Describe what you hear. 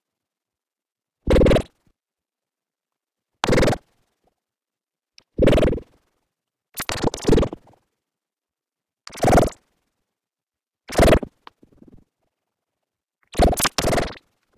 alien sound
alien random sound funny